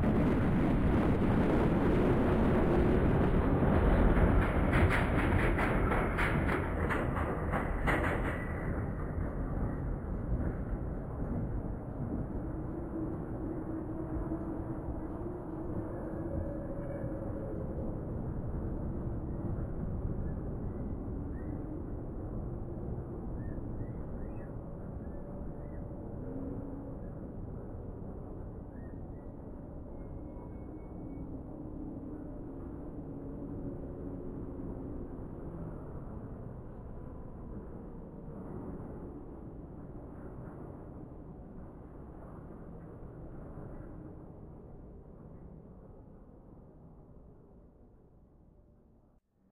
H000 haunted mine
Sounds for a horror ride or haunted house.
scary, ghosts, halloween, dark, haunted-sounds